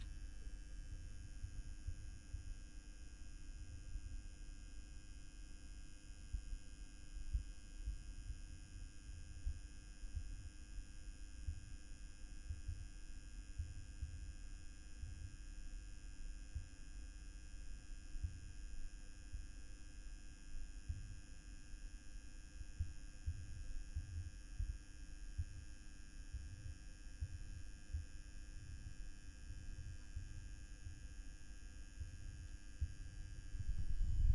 tubelight hum
Hum Generated by an old Tubelight ...Recorded through a PG 58 mic
background, background-noise, general-noise, hum, humming, room-noise, room-tone, tubelight